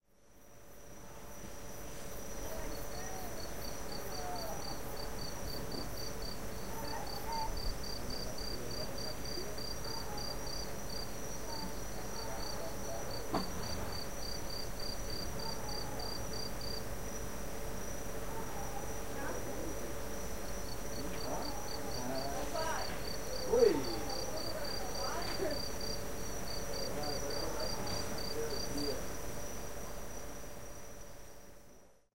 FR.PB.NightAmbience.3
NightAmbience at PraiaBranca, Brazil. Sea-waves, voices and wind as background, several kinds of insects making their performance.